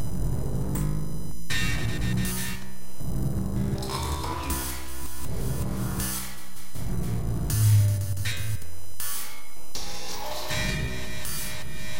PatDrums3NastyIntro
Drumloops and Noise Candy. For the Nose